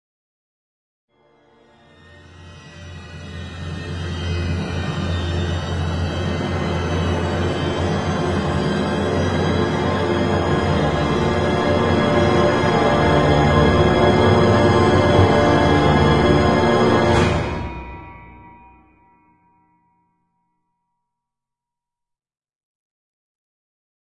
A giant robot taking a single step described using various instruments in a crescendo fashion.